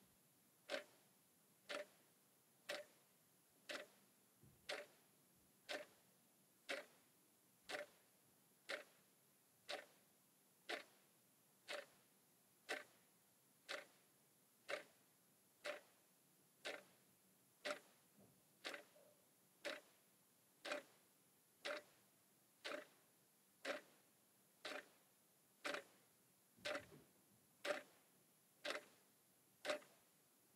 Analog clock ticking CSG
clock, tick, ticking, ticks, tick-tock, tic-tac, time, wall-clock, wallclock